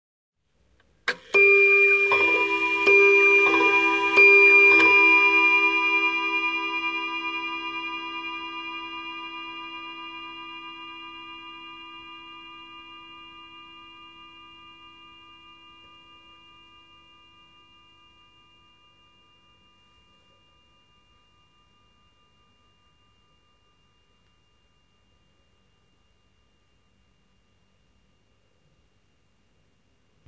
Antique table clock (probably early 20th century) chiming three times.
hour, pendulum, clock, o, chimes, time, antique